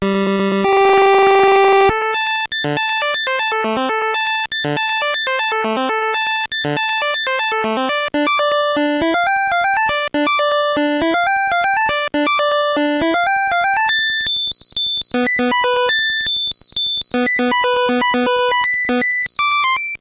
More than Half Pattern 2
nanoloop, drumloops, videogame, gameboy, chiptunes, 8bit, glitch, cheap